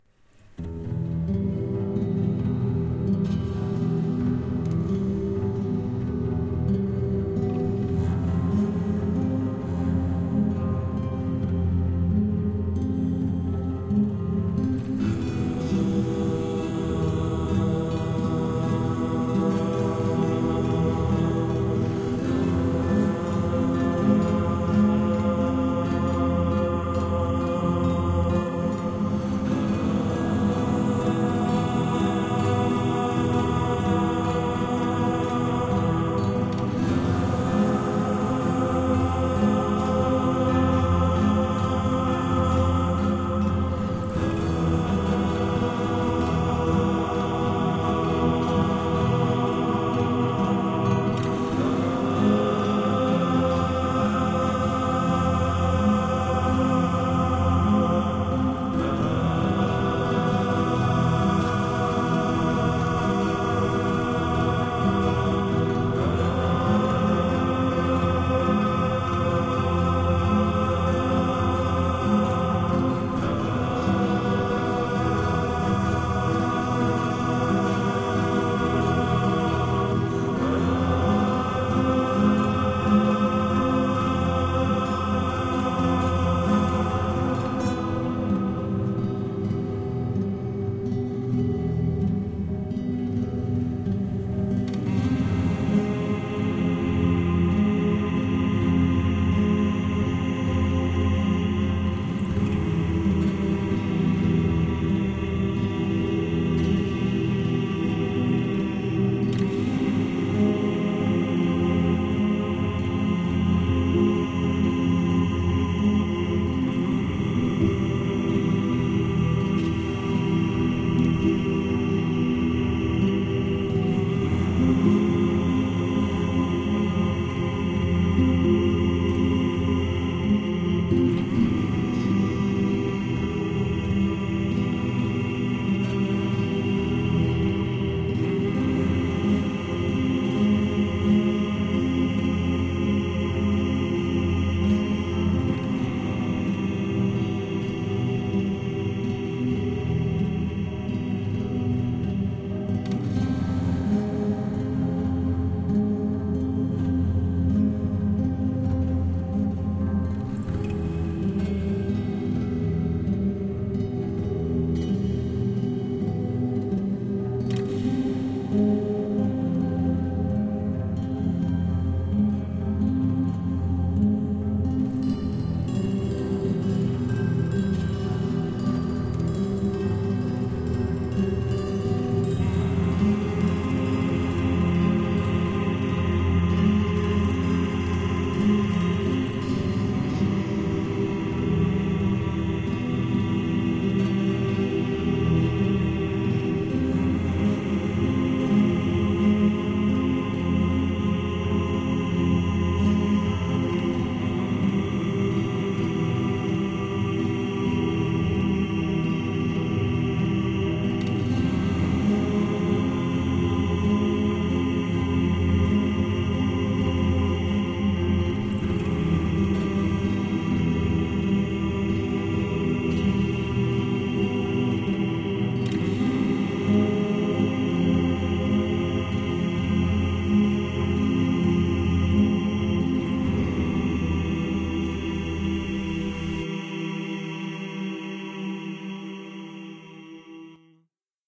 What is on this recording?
Mystery of the Dark Forest
ambience, atmosphere, choir, dark, guitar, music, mysterious